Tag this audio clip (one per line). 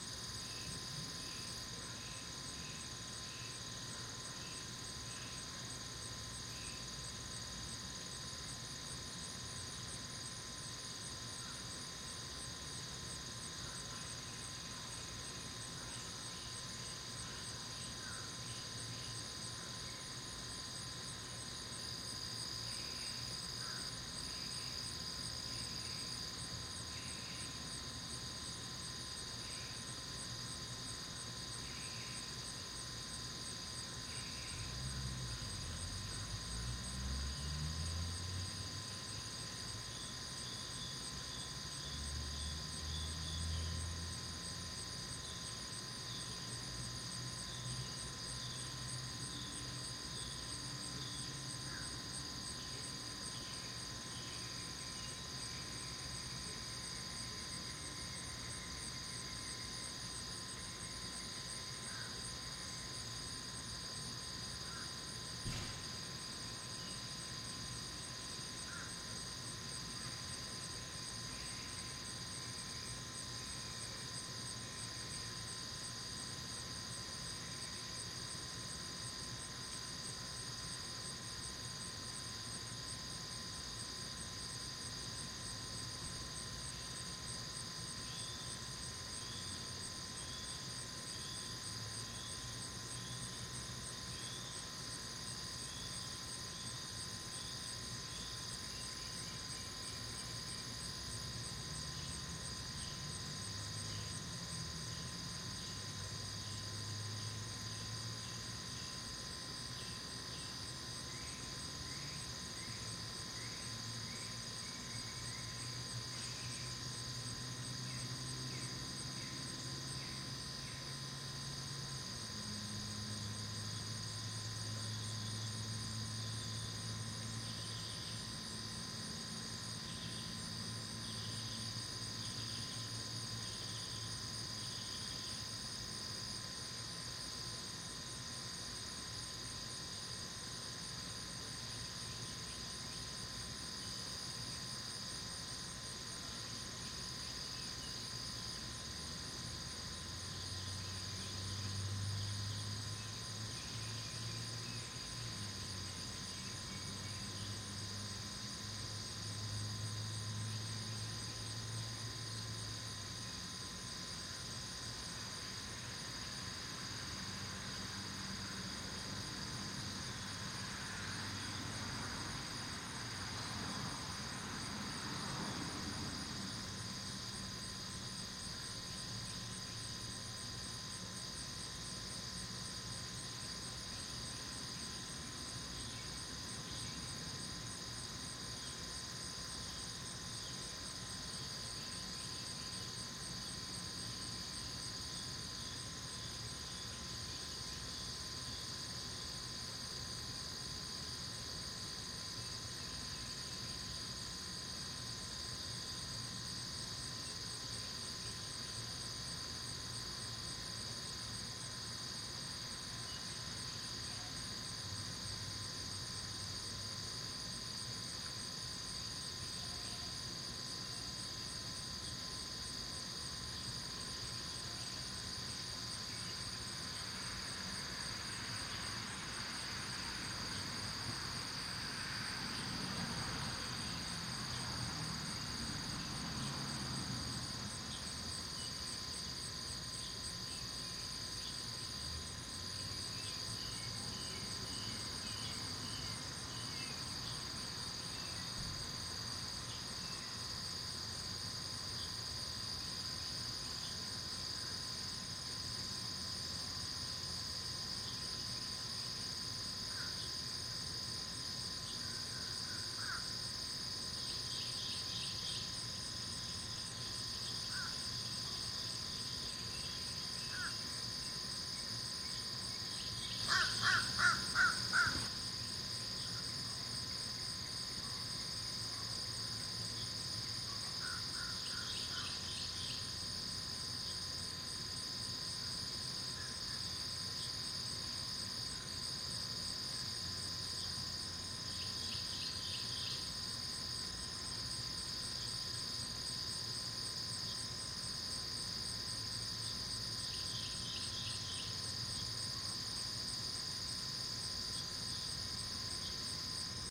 Summer
July
Morning
Crows
Nature
Outdoors
Early
Insects